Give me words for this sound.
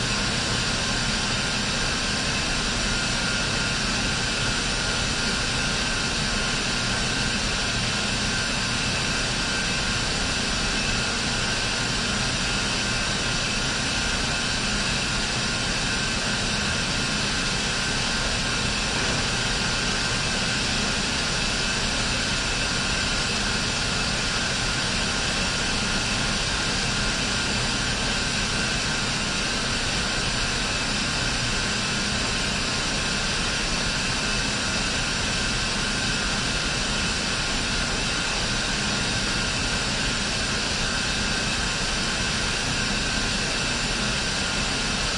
room tone industrial hums hiss factory water treament plant1
factory, hiss, hums, industrial, plant, room, tone, treament, water